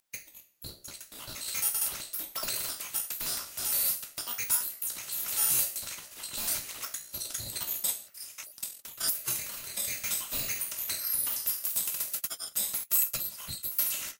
mini-glitch4
alien, annoying, computer, damage, data, digital, error, experimental, file, futuristic, glitch, laboratory, noise, noise-channel, noise-modulation, processed, random, sci-fi, sound-design